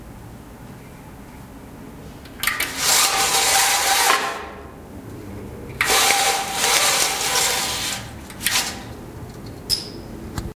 Closing blinds OWI
Recorded with rifle mic. Closing bathroom blinds.
blinds, Closing, OWI